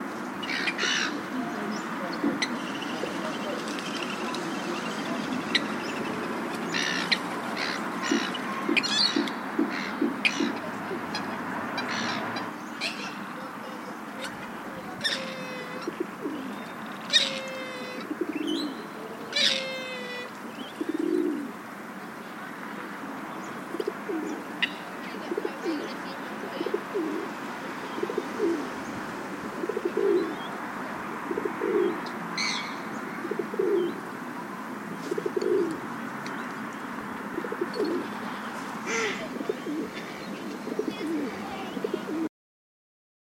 Woodville Wetlands ambiences 2020 06 27 (3)
Ambient recordings from Woodville Wetlands, at Woodville, South Australia. Ducks, pidgeons, seagulls, magpies, noisy miners and other birds can be heard. Drill and traffic noise in the background.
Recorded with BOYA BY-MM1 in a Redmi Note 5 phone using Hi-Res Audio Recorder App
ambience, Australia, bird, birds, birdsong, boya, duck, field-recording, lake, magpie, nature, noisyminer, Pidgeon, seagull